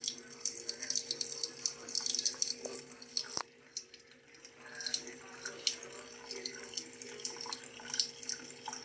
sonido agua llave grabado en casa